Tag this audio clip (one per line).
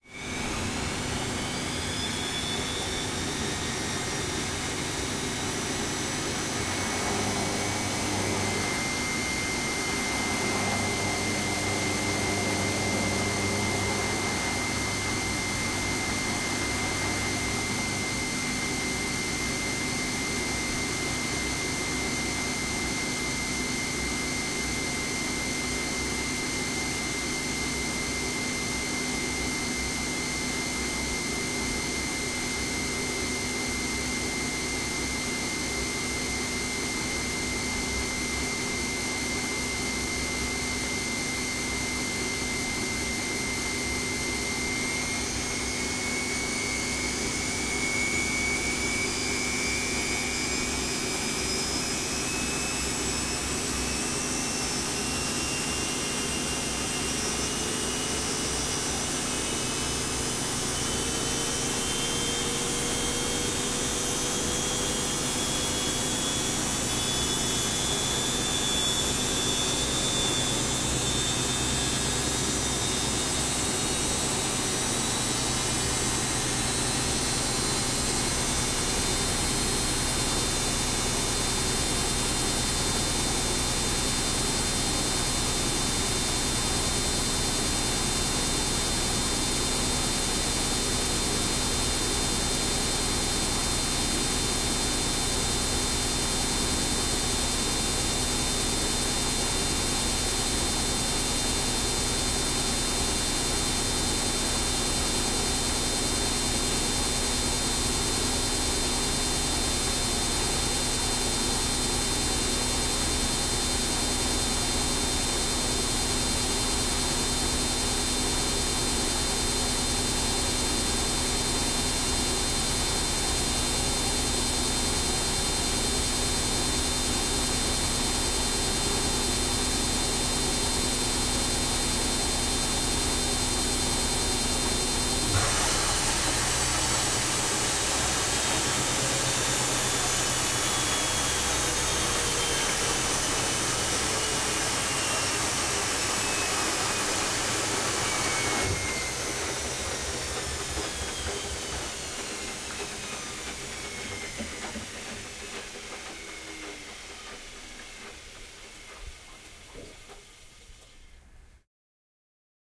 domestic-appliances
spin
spin-cycle
washing
washing-machine